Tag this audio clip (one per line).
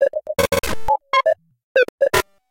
fm
nord
rhythm